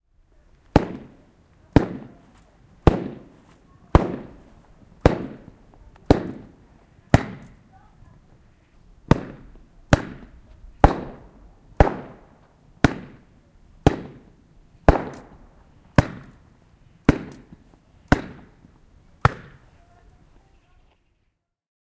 carpet-beating
To beat dust out of a carpet.
beat, beater, carpets